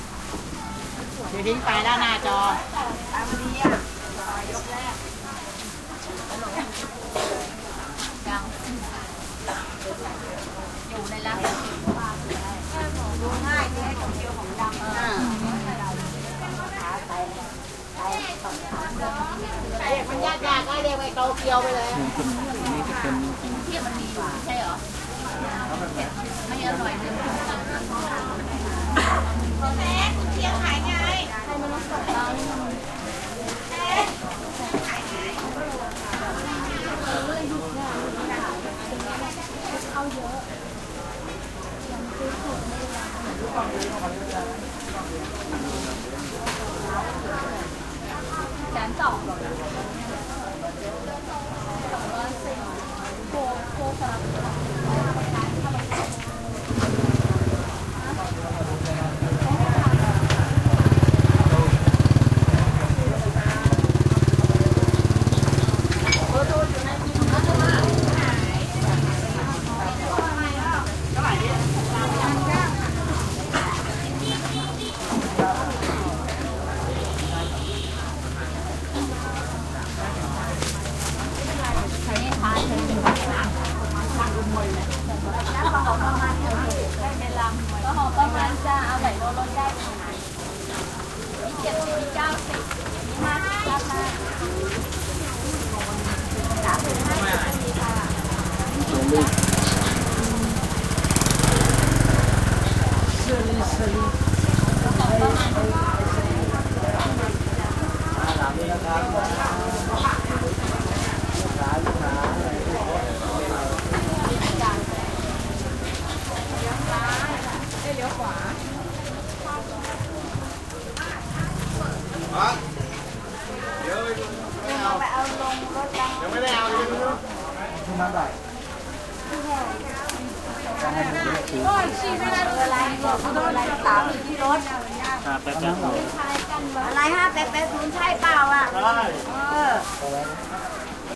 Thailand Bangkok market int covered food busy activity2 plastic bags, wet steps, voices +passing motorcycles
activity, Bangkok, busy, covered, field-recording, food, int, market, Thailand, wet